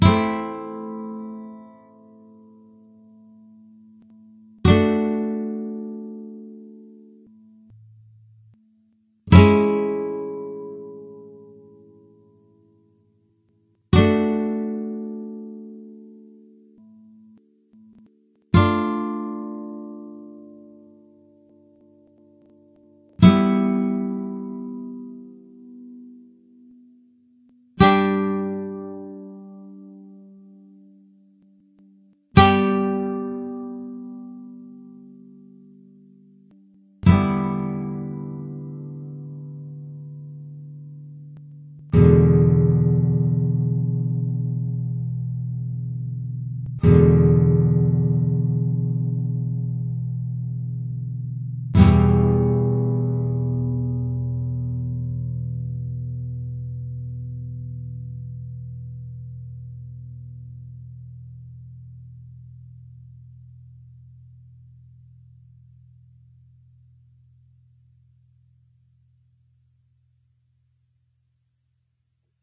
An open composition of 12 open chords uploaded by SpeedY, in 65 seconds, creating a sad atmosphere.